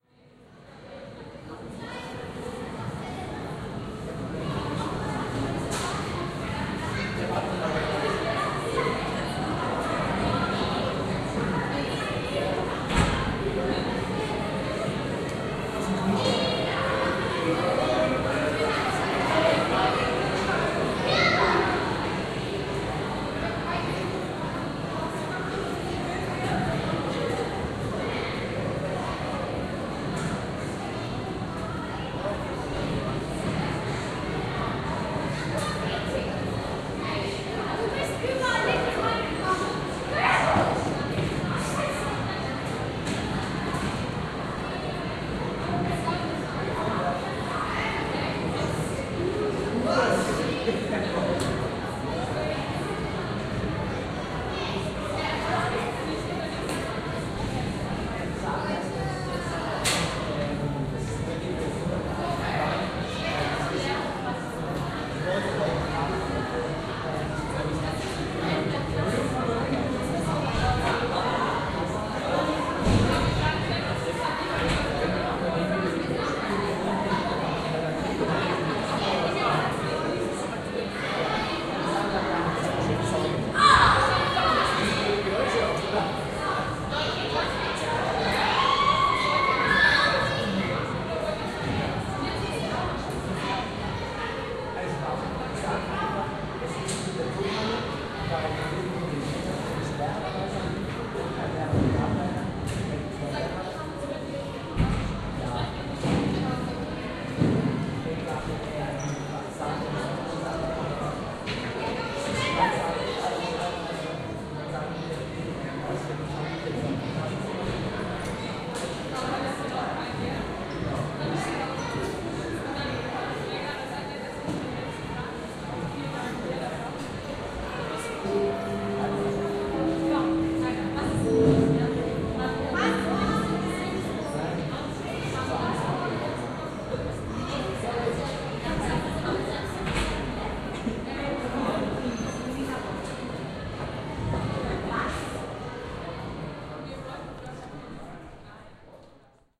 High School Germany Indoor Ambience Before Class
moderately busy ambience in a German High School hallway before classes start - school gong at 02:09
recording device: zoom h4 (w/ Sennheiser 421 simulation)
edited with audacity 2.1.1
Ambience, Germany, HighSchool, School